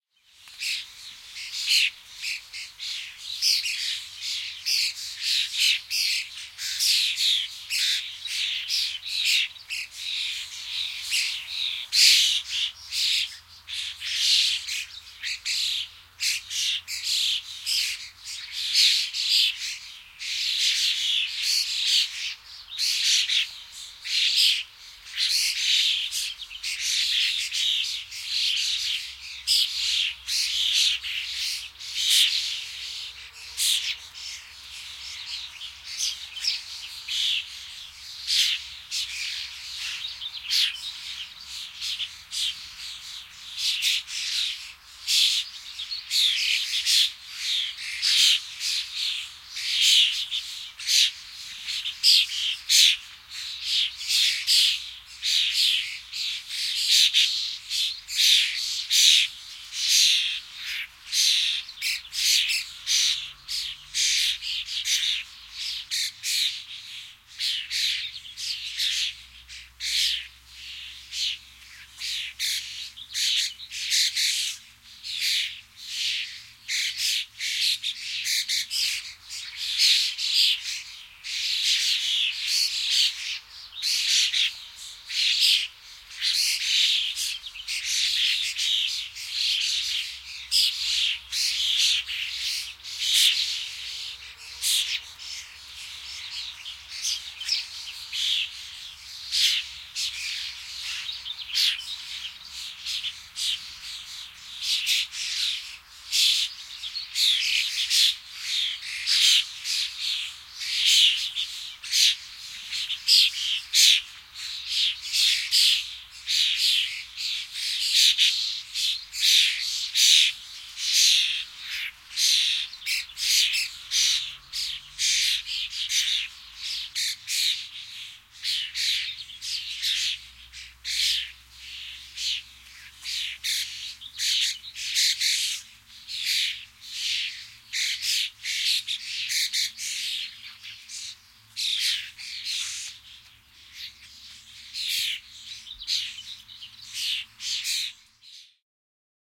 Kottarainen, parvi ääntelee / Starling, flock chirping in a tree, distant traffic (Sturnus vulgaris)
Parvi kottaraisia ääntelee, visertää puussa. Taustalla vaimeaa liikennettä. (Sturnus vulgaris).
Paikka/Place: Suomi / Finland / Vihti, Ojakkala
Aika/Date: 23.06.1999
Kottarainen, Linnut, Yle, Suomi, Finnish-Broadcasting-Company, Yleisradio, Lintu, Starling, Tehosteet, Birds, Field-Recording, Viserrys, Soundfx, Finland, Bird, Chirp